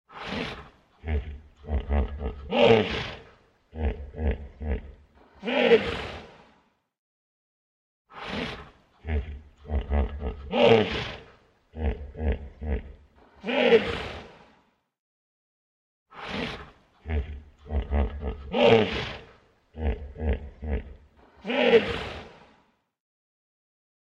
Hippo Grunts Roar
Hippo Grunts Roar
Grunts, Hippo, Roar